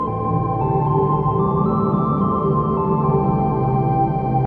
C pentatonic loop
A loop in c amjor using the pentatonic scale
drone, loop, pentatonic, childlike